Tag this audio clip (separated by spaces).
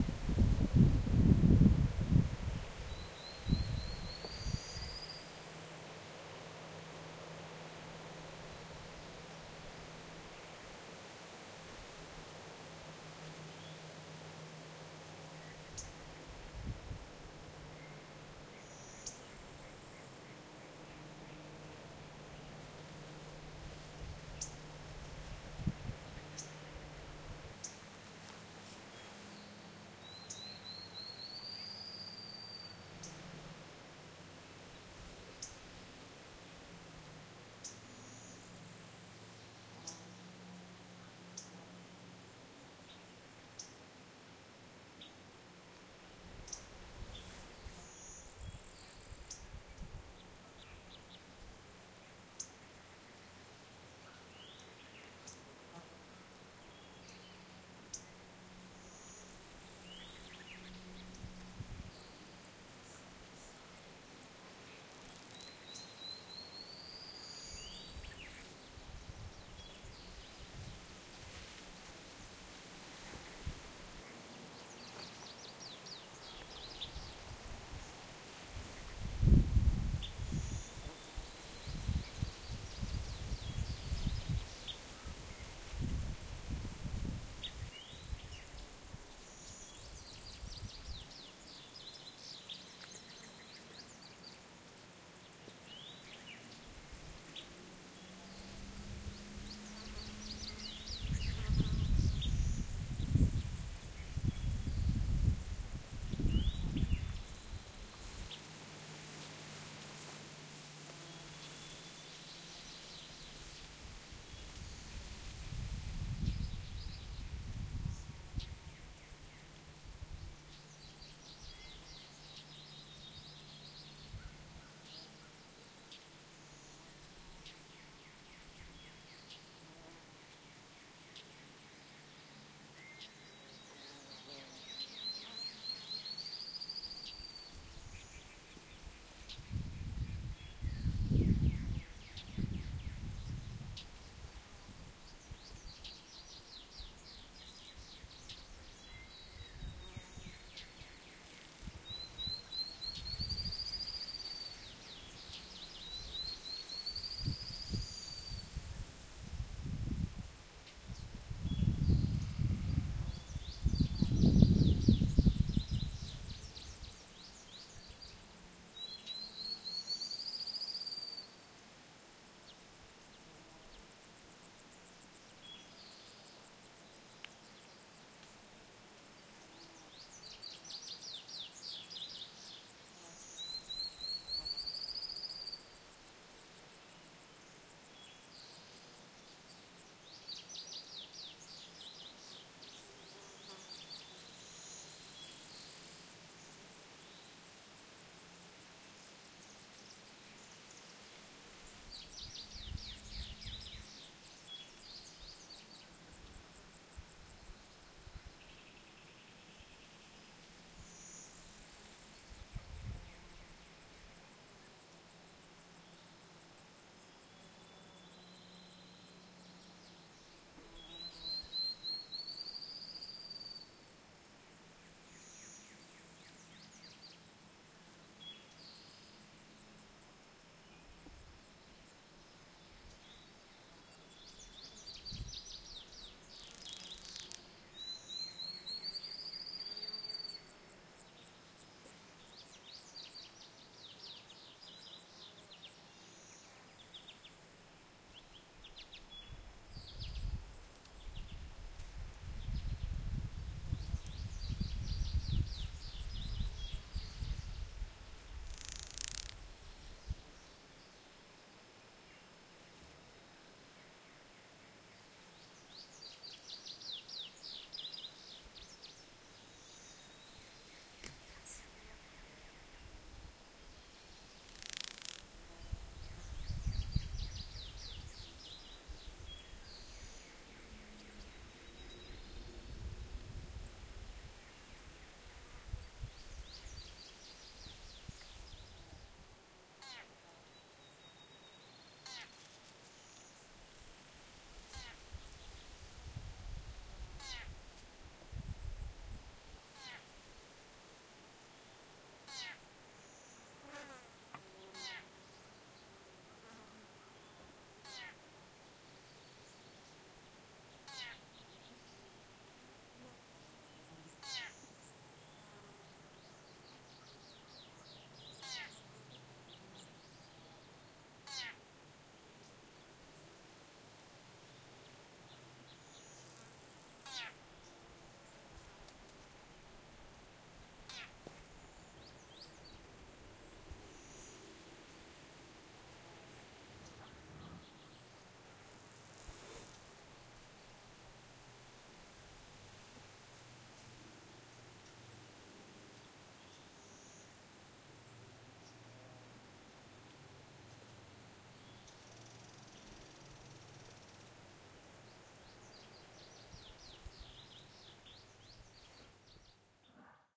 insects nature